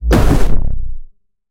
Laser Impact
Bang, Blaster, Fire, Gun, Gunshot, Heavy, Laser, Light, Loud, Machine, Pew, Pulse, Rifle, Rikochet, SciFi, Shoot, Shot, videgame